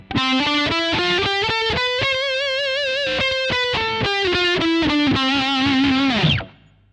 I simply played a C major scale on the electric guitar.